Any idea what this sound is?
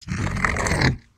An ogre like voice
horror, scary, creature, processed, beast, monster, growl, noises